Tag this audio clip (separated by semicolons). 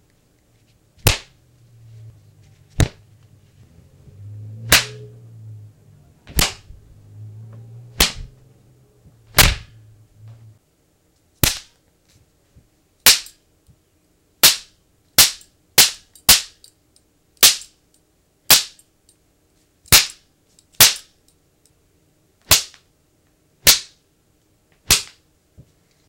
slap; mug; hurt; belt; whip; flogging; flog; beating; punch; beat; fight; attack